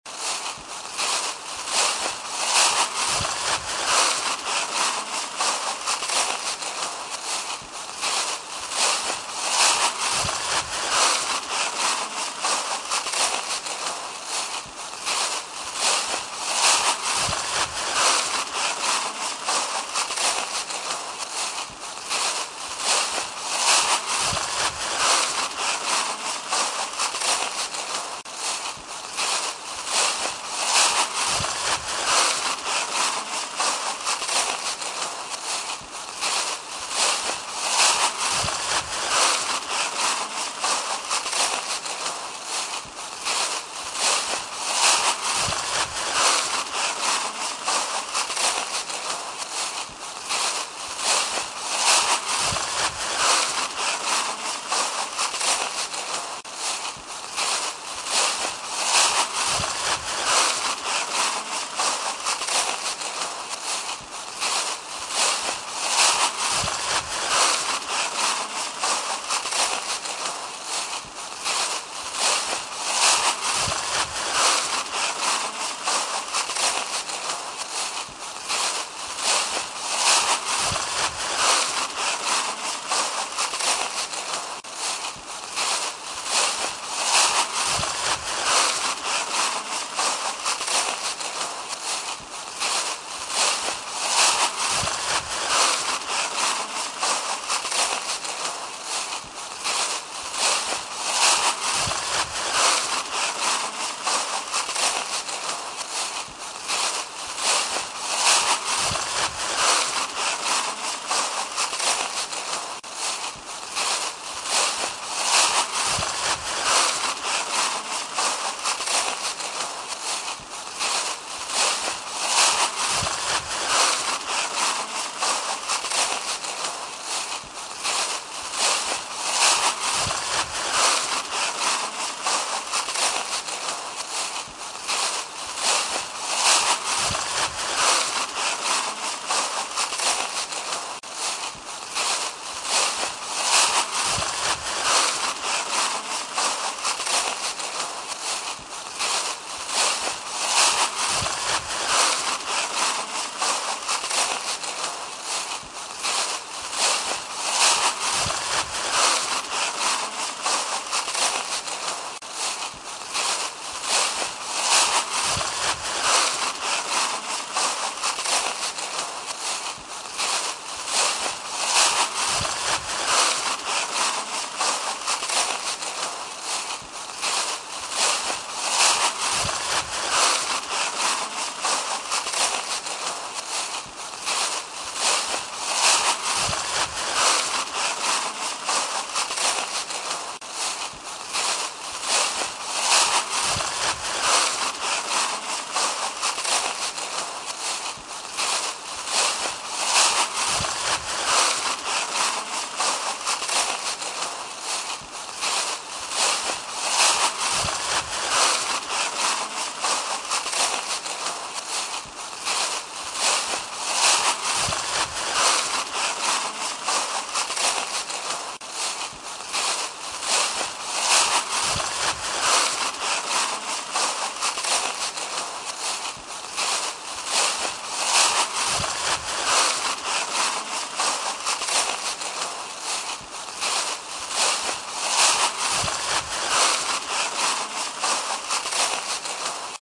Walking trough dried leafs in autumn. Recorded with Aputure V-Mic D1